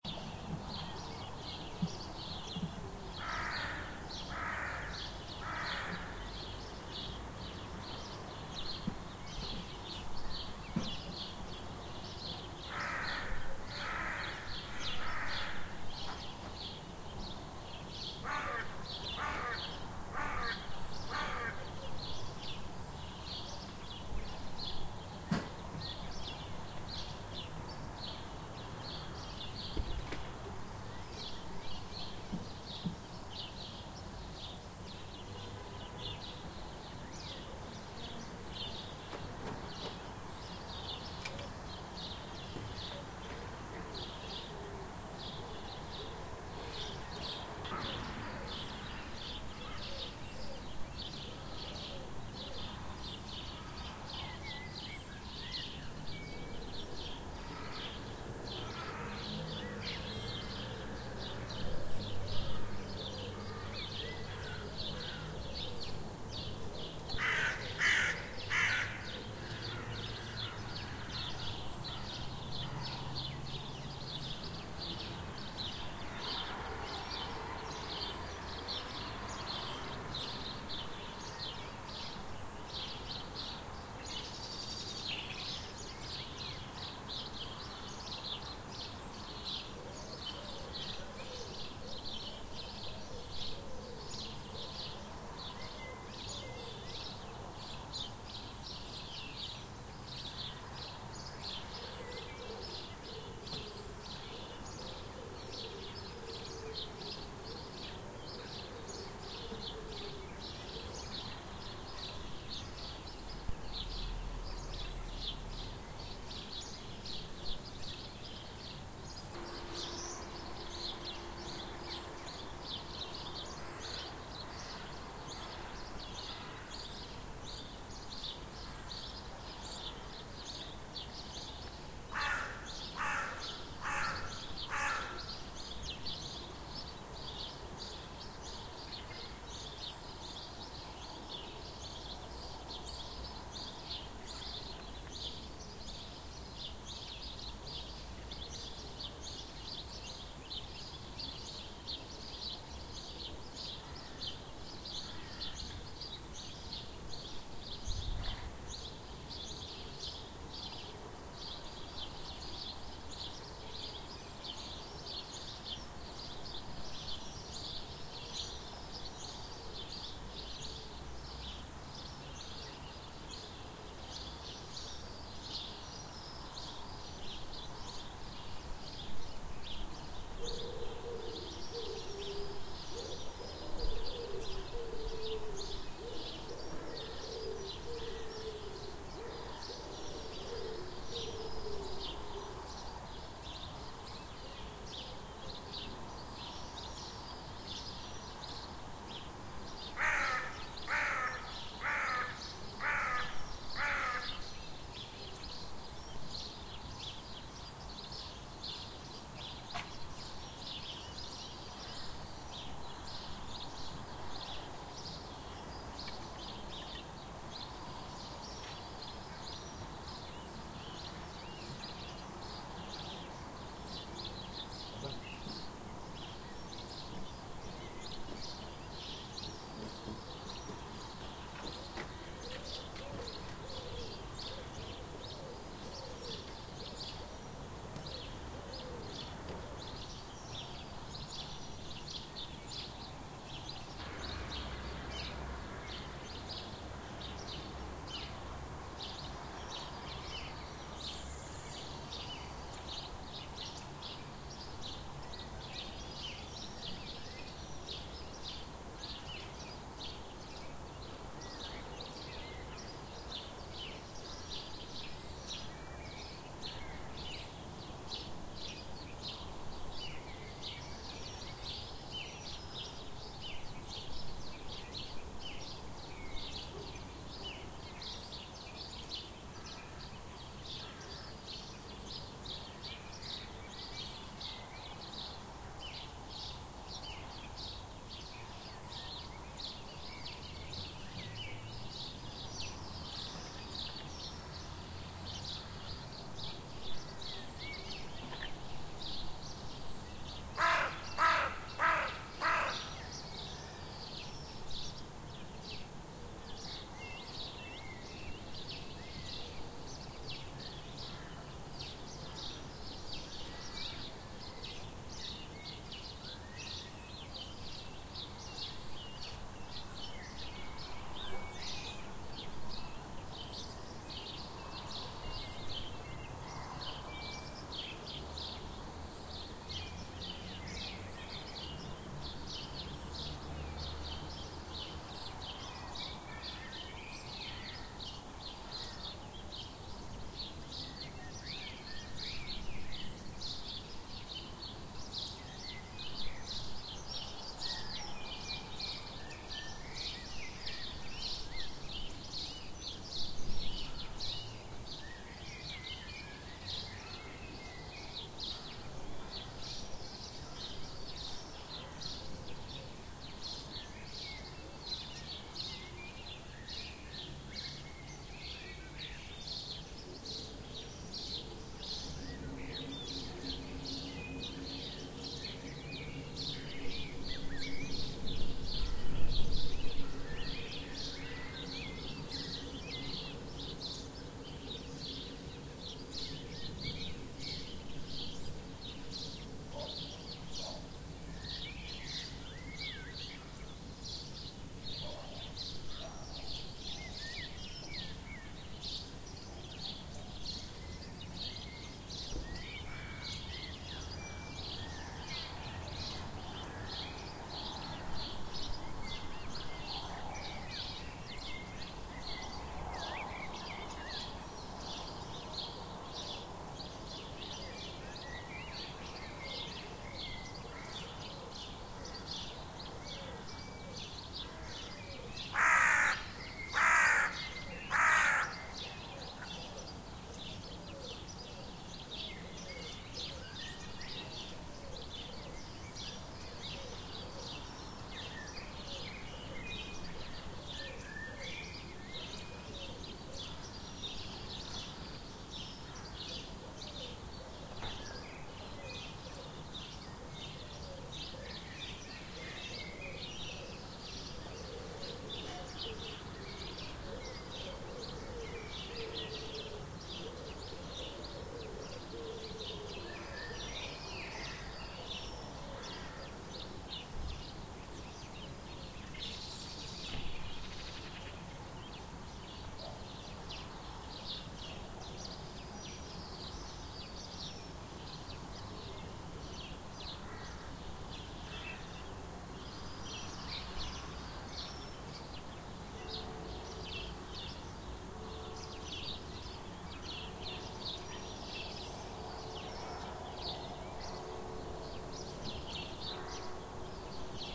Approx. 0650hrs, early March 2021. Put my recorder in the open bathroom window. I had to have the gain up higher than I wanted as the sparrows are down in the side garden about 30 yards away. The crow sounds closer, perhaps on our neighbour's roof. Collared doves also cooing but faint.
The sound of our boiler is in the background.
ambience, chorus, collared, crow, Dawn, field-recording, Pennines, rural, South, sparrows, UK